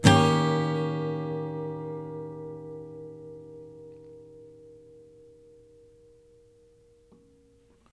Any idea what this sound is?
chord Eflat7
Yamaha acoustic through USB microphone to laptop. Chords strummed with a metal pick. File name indicates chord.
strummed, chord, guitar, acoustic